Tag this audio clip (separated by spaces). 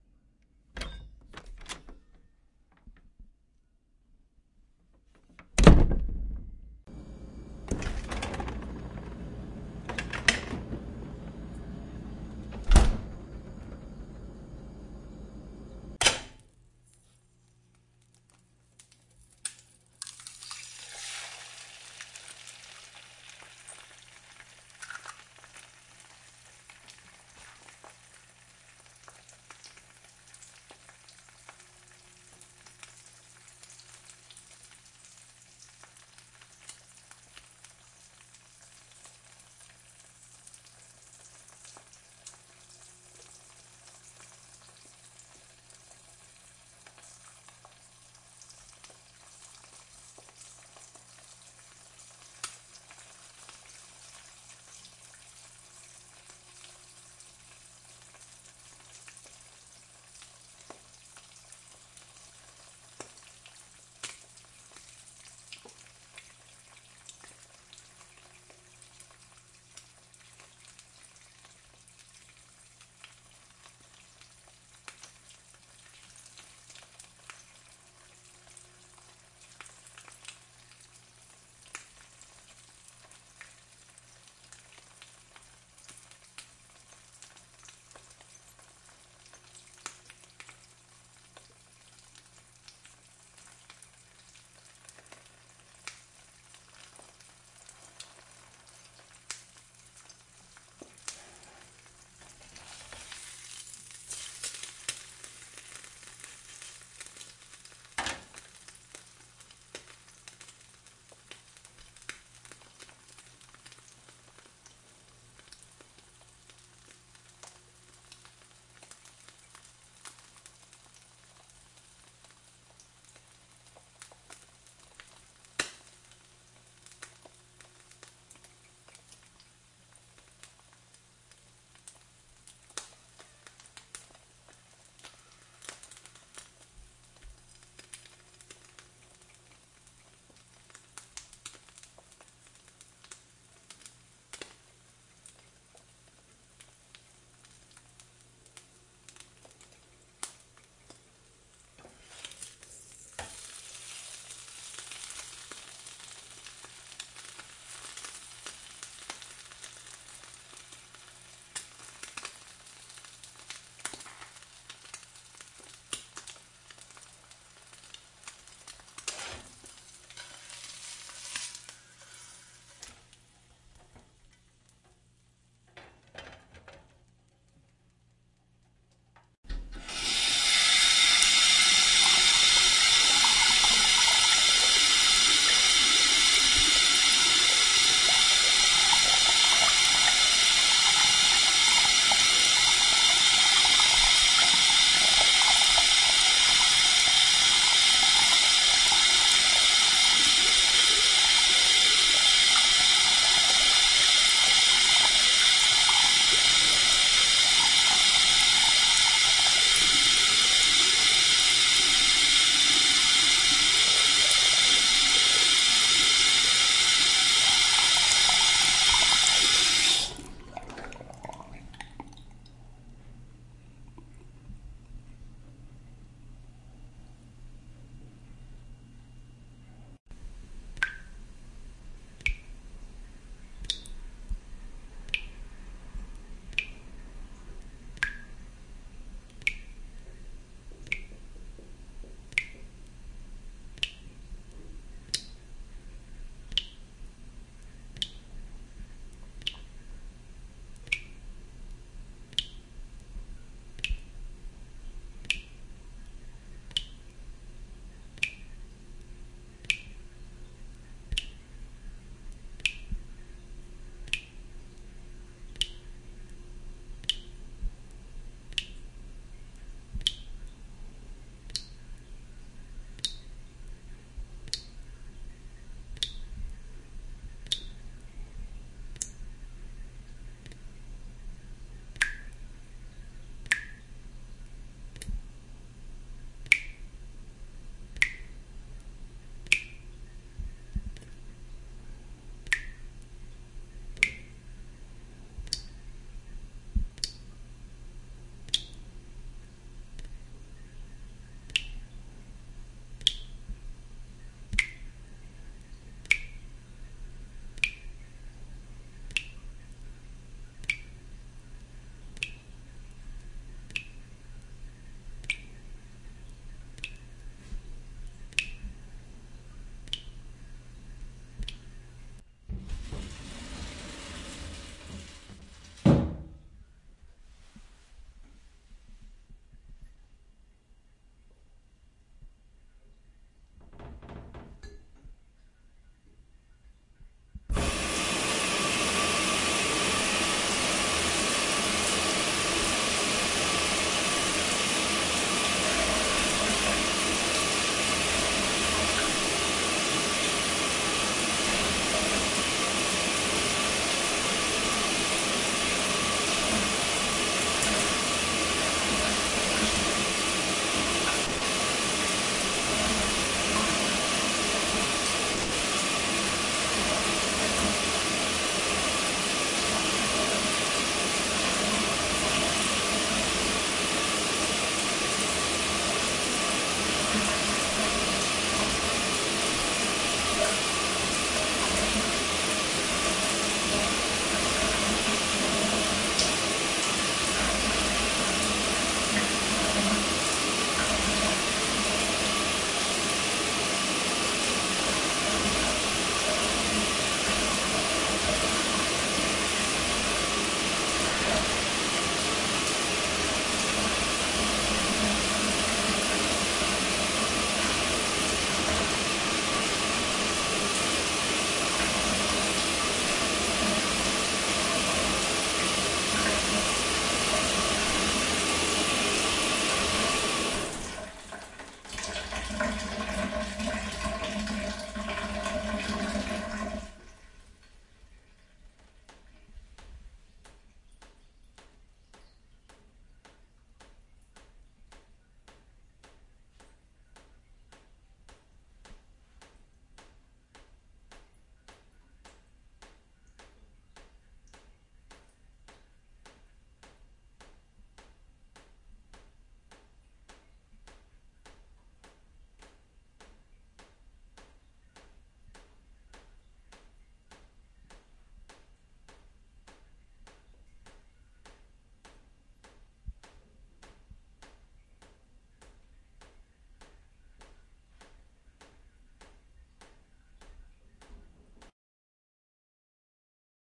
cooking dripping faucet household kitchen skillet stove